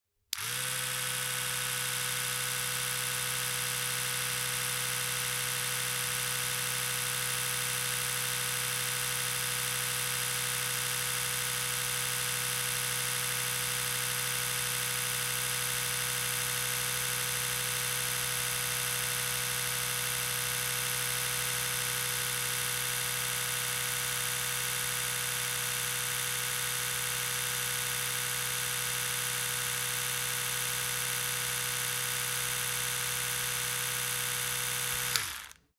electro toothbrush without head away
Electrical toothbrush without brush-head, "away" position. In some way it sounds like a dental drill.
Recorded with Oktava-102 microphone and Behriner UB-1202 mixer desk.
toothbrush, electrical, away, kitchen, bathroom, drill, dental